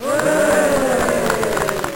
LFS YayCheer
A small crowd cheering "Yay!" (or "Hooray") and clapping, sounding like it's coming from a sound card.
cheer, hooray, lo-fi, lo-fi-stupidity